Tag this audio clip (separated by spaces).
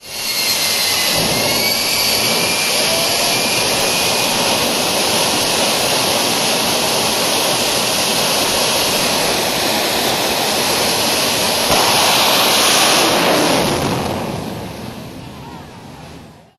field-recording medium-quality automotive race-track engine